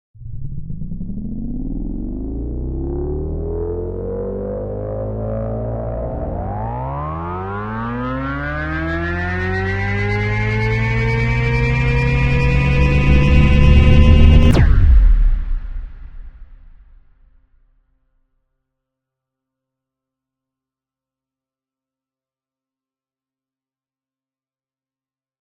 A heavily processed effect to resemble a spaceship jumping to warp speed or a laser-firing, whatever you need it for. Have fun :)
interstellar, space, light-speed, warp, laser, light, travel, ship, spaceship, speed, sci-fi